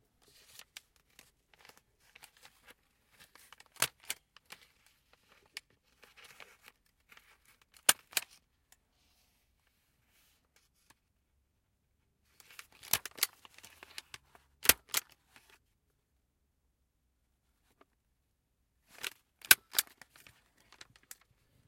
Stapling papers 01
Stapling papers with a metal stapler
paper, office, clicking, click, spring, stapler, metal, crunch, staple, OWI